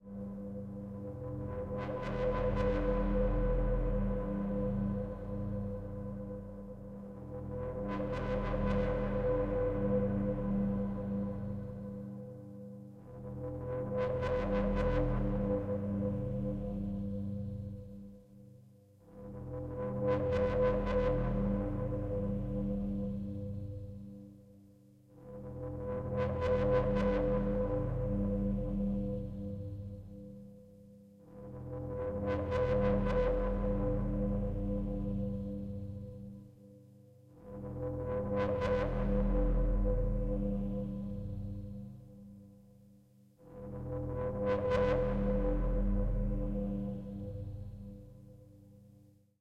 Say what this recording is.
An atmospheric ambience sound, made with modular equipment.